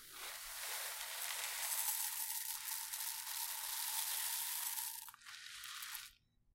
Recording of rice puff cereal being poured into an empty ceramic bowl.